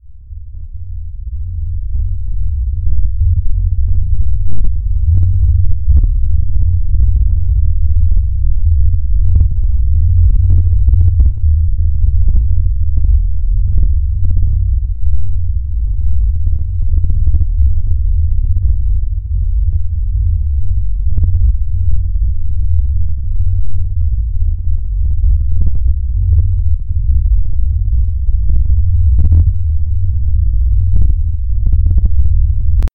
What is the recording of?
A creepy ambiance I made in Audacity.
horror, ambiance, creepy, scary, spooky